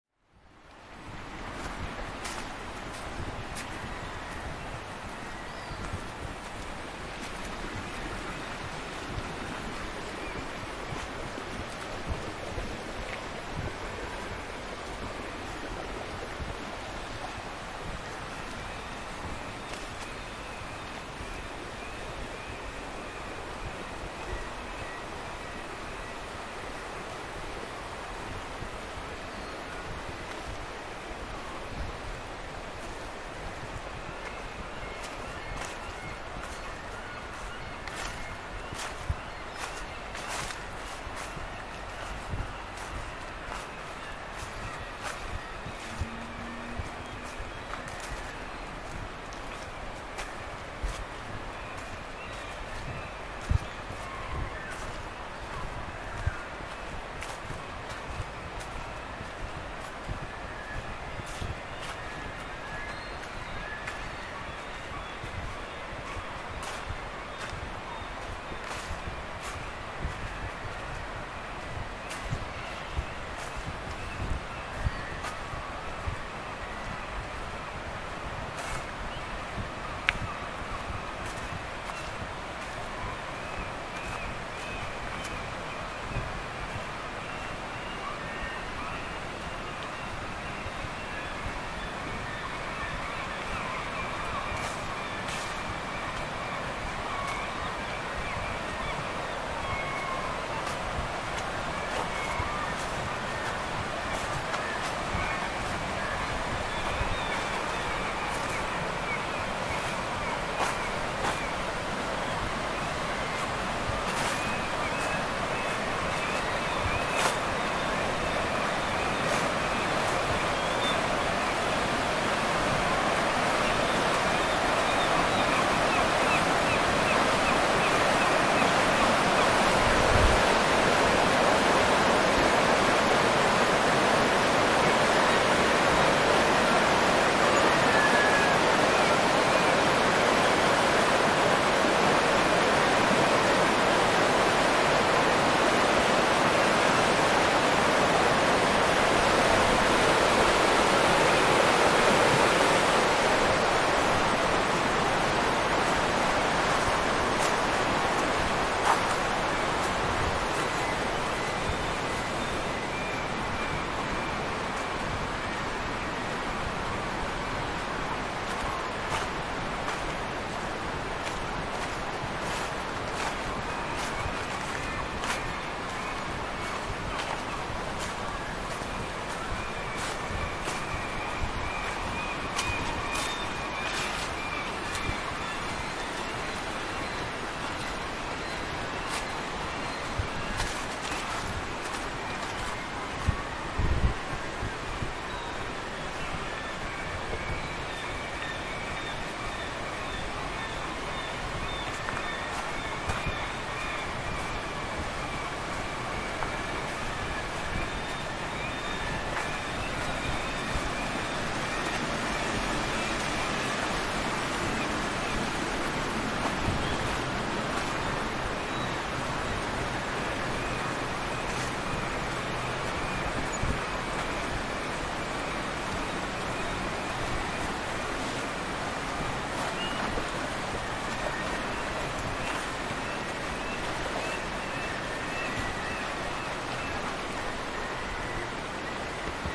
River Walk
Morning on the Puntledge River during the November salmon run.
ambient, birds